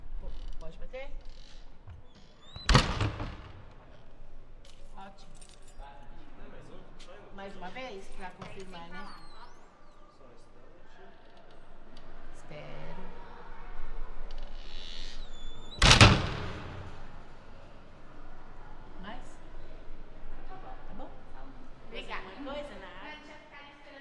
Wooden door opens and closes at the Colégio de São Bento.
Ruído de porta de sala de aula abrindo e fechando no Colégio São Bento, São Paulo.
Recorded with Beyerdynamics M88 and Tascam DR-680 for "Os Irmãos Mai" project, short-length film directed by Thais Fujinaga.